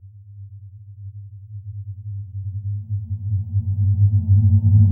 Big Drum (Reverse)
bass-drum, big-drum, drum, reverse